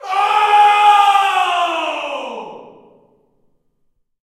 Male screaming an angry "oooh!" in a reverberant hall.
Recorded with:
Zoom H4n